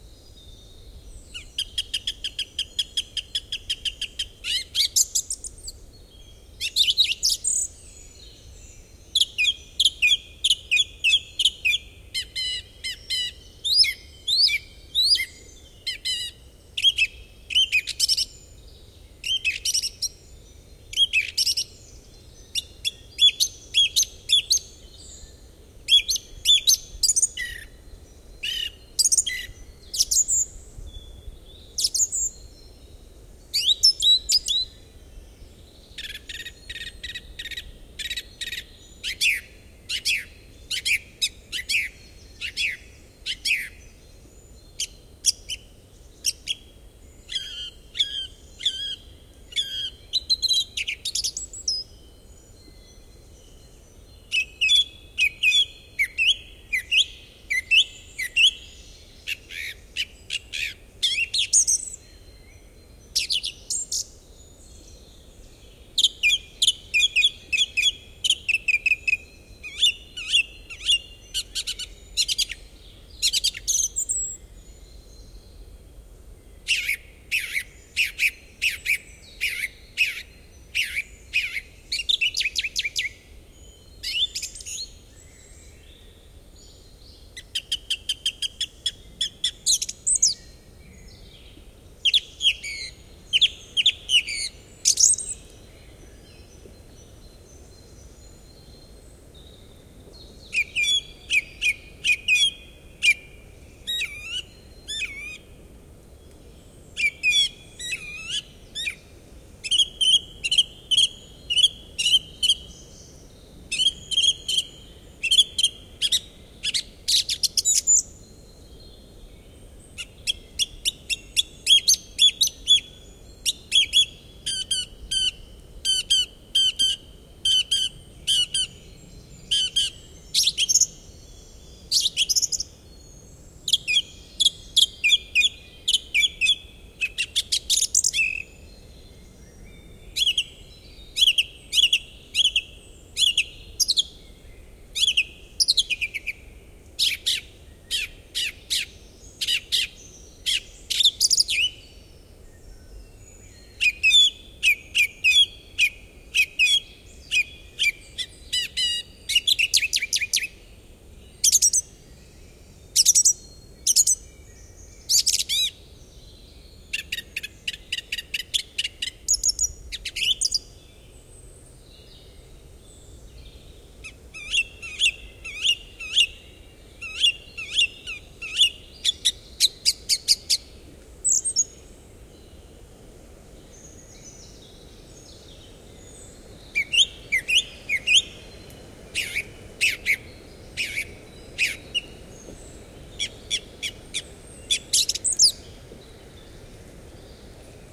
Song thrush on a spring evening, 17th of march 2007 in a forest north of Cologne, Germany. Choir of other birds and sound of wind in the still leaveless trees to be heard in the background. Vivanco EM35 with preamp into Marantz PMD 671.
drozd-zpevny, enekes-rigo, grive-musicienne, singdrossel, taltrast